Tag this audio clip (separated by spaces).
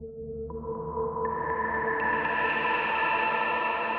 atmospheric electronica euphoric chillwave polyphonic warm chillout ambience far calm soft melodic pad distance spacey